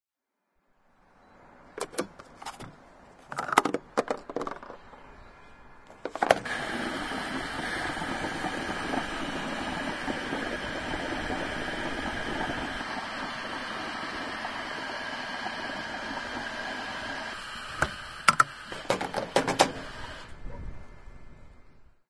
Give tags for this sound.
fill-up-car-with-petrol
gas-station
petrol-station

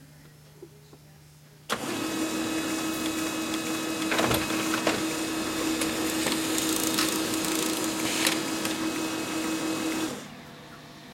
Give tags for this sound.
laserjet
environmental-sounds-research
unprocessed
print
printer

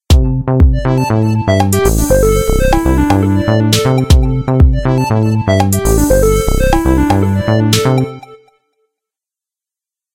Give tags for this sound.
humiluty
Figure

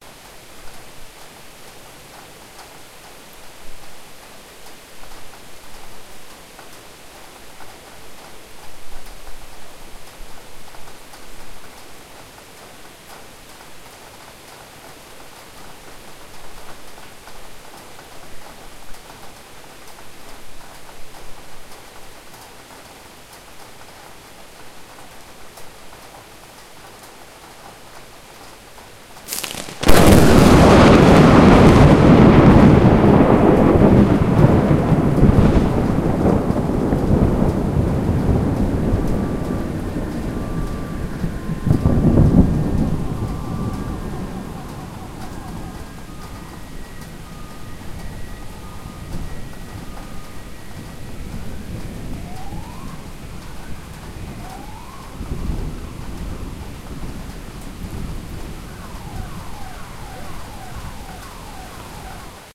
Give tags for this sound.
cars
close
field-recording
lightning
loop
nature
rain
roof
sirens
storm
thunder
weather

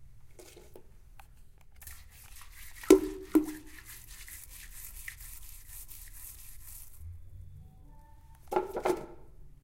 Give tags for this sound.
campus-upf
bath
cleaning
bathroom
toilet
UPF-CS14
WC
brush

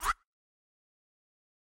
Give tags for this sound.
beat
effext
game
vicces
pc
audio
jungle
fx
sound
sfx

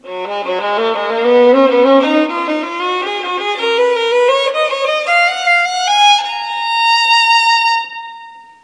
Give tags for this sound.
Improvising
Trills
Ornamentation
Baroque
Phrasing
Scales
Violin

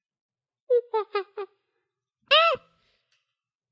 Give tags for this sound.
ape
chimp
chimpanzee

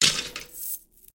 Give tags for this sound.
foot
footstep
skeleton
step

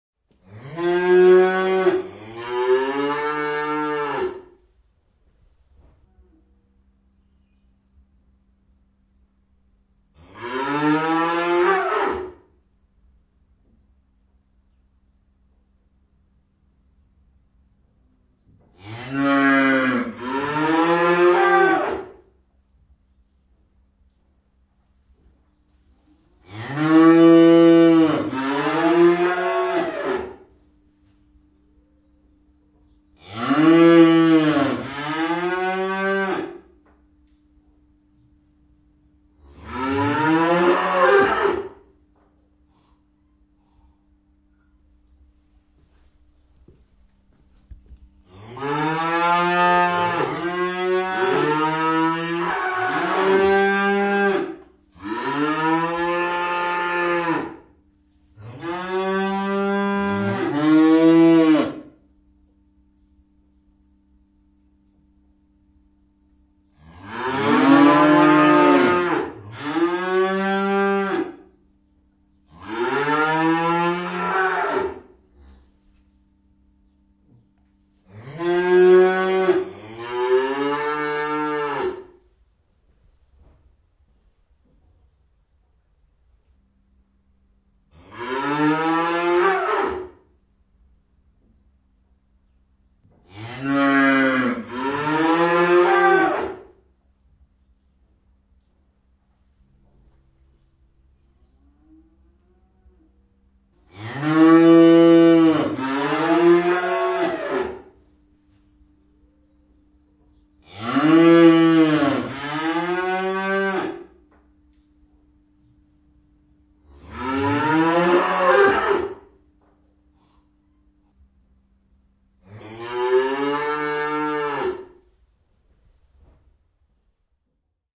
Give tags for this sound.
Agriculture
Ammua
Ammuminen
Animals
Barn
Cattle
Cowhouse
Cows
Domestic-Animals
Field-Recording
Finland
Finnish-Broadcasting-Company
Karja
Maatalous
Mooing
Navetta
Soundfx
Suomi
Tehosteet
Yle
Yleisradio

